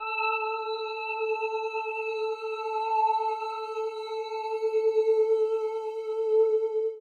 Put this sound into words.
A creepy sounding sample